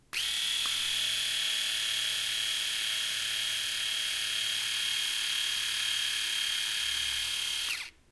Turning on and off an electric razor. Recorded with AT4021s into a Modified Marantz PMD661.